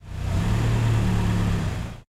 Car Accelerating2
Sound of a car motor accelerating in big car park (noisy and reverberant ambience).
accelerating, campus-upf, car, centre, comercial, glories, mall, motor, park, parking, shopping, UPF-CS13